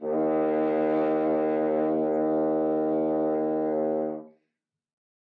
One-shot from Versilian Studios Chamber Orchestra 2: Community Edition sampling project.
Instrument family: Brass
Instrument: F Horn
Articulation: sustain
Note: D#2
Midi note: 39
Midi velocity (center): 42063
Microphone: 2x Rode NT1-A spaced pair, 1 AT Pro 37 overhead, 1 sE2200aII close
Performer: M. Oprean
single-note, midi-note-39, brass, multisample, dsharp2